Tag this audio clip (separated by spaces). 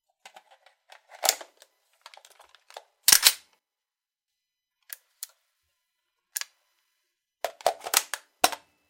gun rifle reload weapon m4